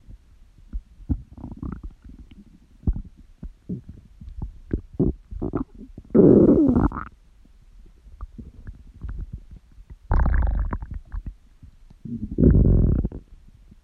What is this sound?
My gut was particularly loud after eating lasagna, so I figured I’d get some cool sound bites by pressing the microphone end into my gut.
Body, Digestion